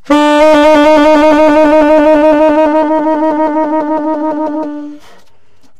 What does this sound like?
TS semitone trill d3
The format is ready to use in sampletank but obviously can be imported to other samplers. The collection includes multiple articulations for a realistic performance.
vst, sampled-instruments, sax, saxophone, tenor-sax, jazz, woodwind